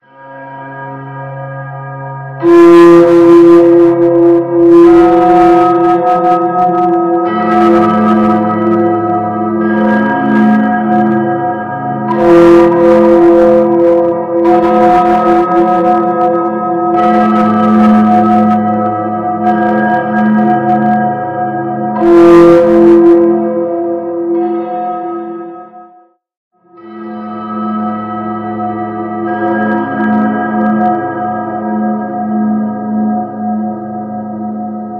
Simultaneous Notes
Series of notes played simultaneously and then mixed.
Echo, Verbate, ReaDelay, Notes, ReaPitch, Stereo